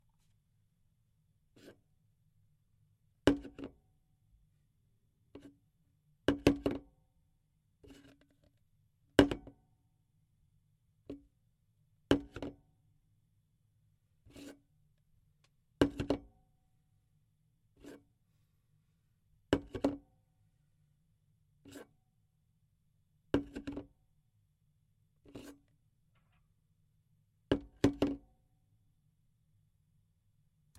Plastic jug
Unprocessed recording of a plastig jug being picked and put down on a table.
bowl, pick-up, table, jug, put-down, plastic